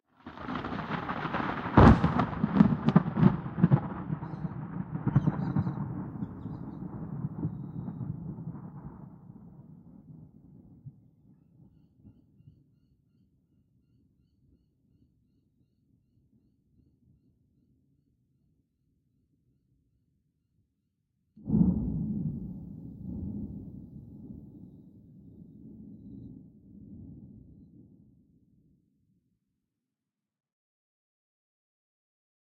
Thunder Re-edit
A sudden thunderclap, startling some galahs.
An edited version of the original thunder recording, another version of which I uploaded earlier this year.
Originally recorded in 2018 on a Samsung J2 Pro phone.
Edited in Audacity.
cloud, crack, lightning, rain, rumble, storm, thunder, thunderclap, weather